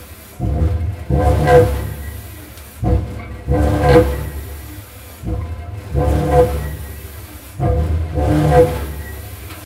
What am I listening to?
Evil Machine Loop 00
A weird and low pitched mechanical loop sound to be used in horror games. Useful for evil machines running endlessly, tormenting the world og peace and goodness.
ambience, epic, fantasy, fear, frightening, frightful, game, gamedev, gamedeveloping, games, gaming, horror, indiedev, indiegamedev, loop, rpg, scary, sfx, terrifying, video-game, videogames